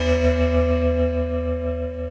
Pad couvercle casserole